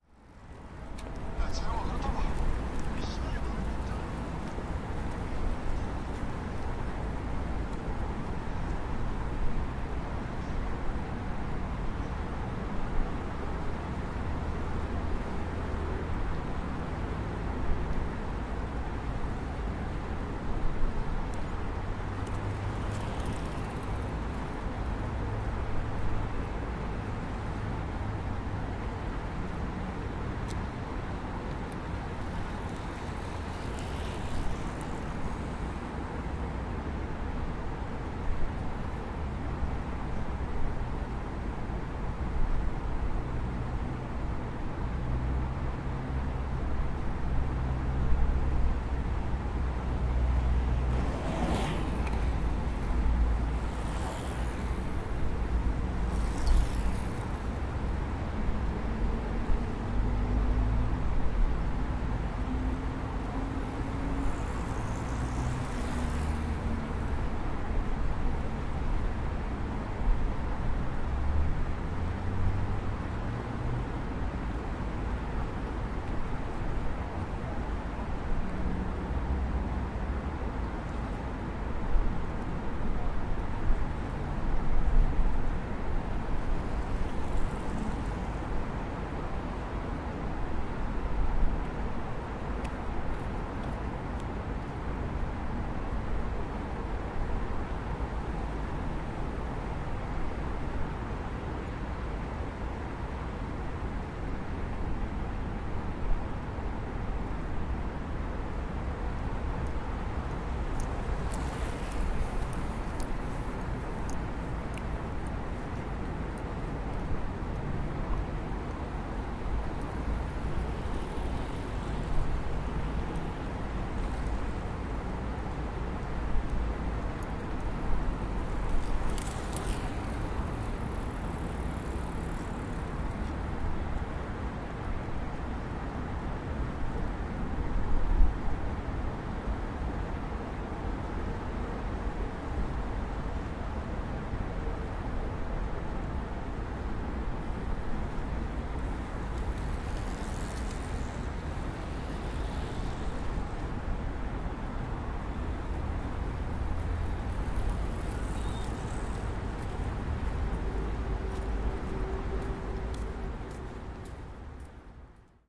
0302 Noisy river bank
Bicycles, traffic in the background. People walking.
20120616
bicycle, field-recording, footsteps, korea, seoul, traffic